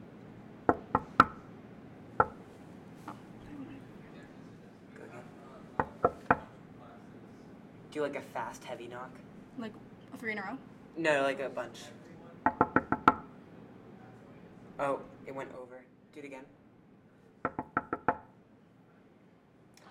knocking on door
someone knocking on a door softly and then more intensely.
door, foley, knock, knocking